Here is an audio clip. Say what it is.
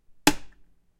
egg being broken